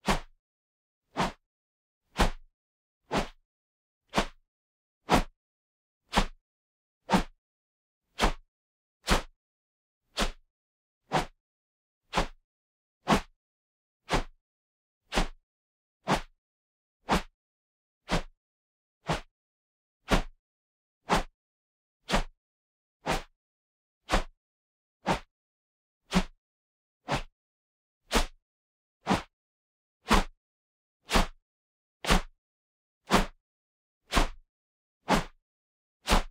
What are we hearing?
swipes and whooshes tennis racket horizontal fast and short swings stereo ORTF 8040
This sound effect was recorded with high quality sound equipment and comes from a sound library called Swipes And Whooshes which is pack of 66 high quality audio files with a total length of 35 minutes. In this library you'll find different air cutting sounds recorded with various everyday objects.
attack, beat, body, box, combat, fast, fighting, foley, kickbox, martial-arts, punch, racket, swing, swings, swipe, swipes, tennis, whip, whoosh, whooshes